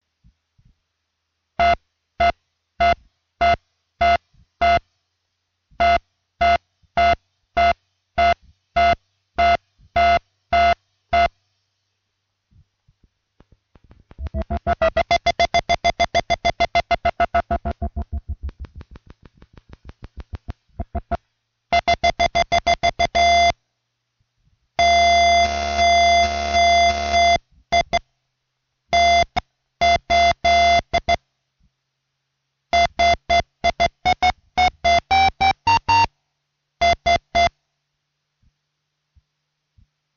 Sounds from a Korg Monotron Duo.
Battery, Powered, ribbon, synth